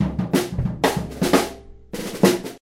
second line drum beat with floor tom
A short drum beat of second line style, with snare and floor tom. Ludwig Supraphonic.
beat, drum, tom, floor, second, line, 2nd